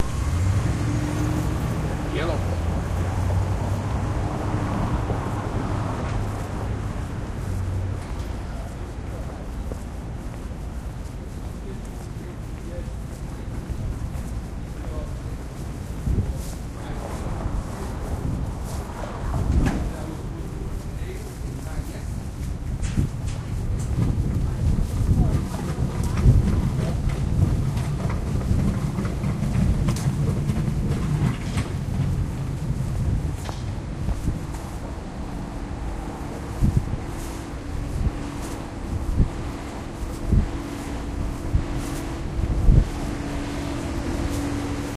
Passing people on the way from the garage to the office.